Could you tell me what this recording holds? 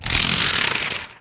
es-spriralbindertear
spriral binder tearing